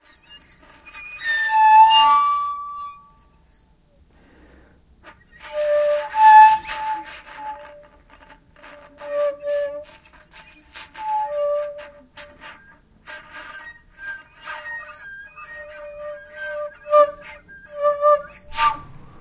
Kaval Play 12
Recording of an improvised play with Macedonian Kaval
Acoustic, Instruments, Kaval, Macedonian